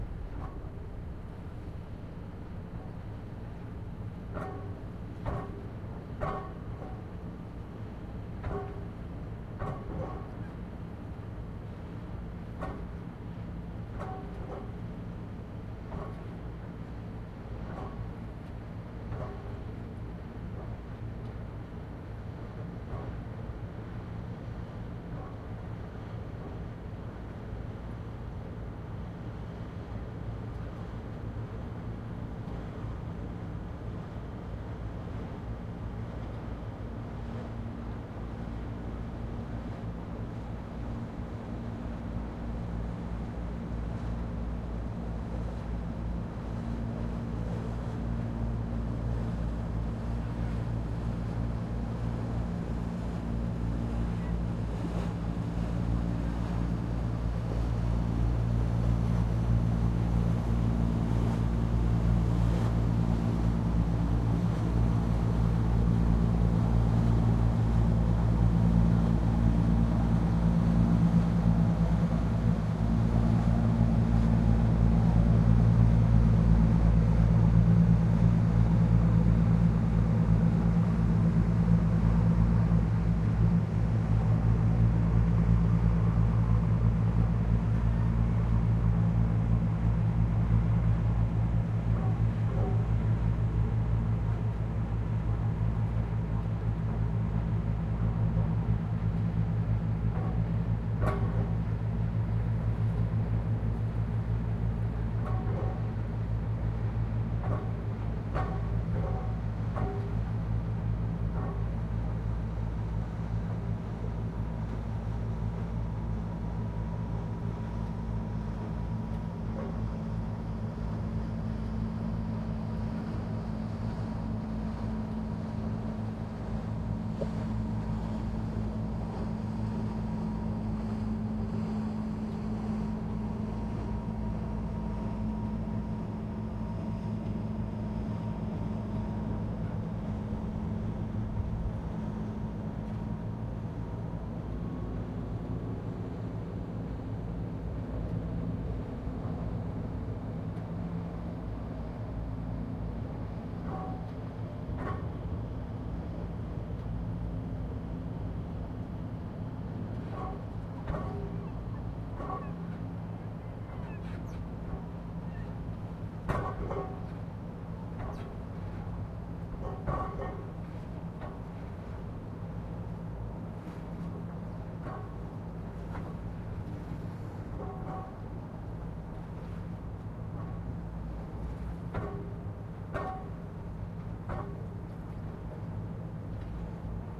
111011 - Venedig - Hafenatmosphaere 1
field recording from the 5th floor of the Hilton Venice hotel in direction of Venice central
boat, field-recording, port, venice